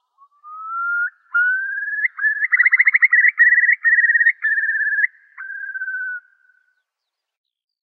A dual mono Field-recording of an Eurasian Curlew ( Numenius arquata ). Rode NTG-2 > FEL battery pre-amp > Zoom H2 line in.